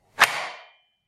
A metallic bolt being moved harshly